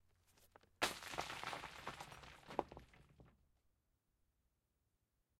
SFX Stone Calcit DeadSea Throw far #5-190
glassy stones being thrown
falling, glass, pebbles, rock, rocks, stone, stones, throw